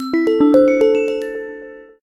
Ringtone: Foofaraw
A 2 second ringtone made using GarageBand.
alert, ring-tone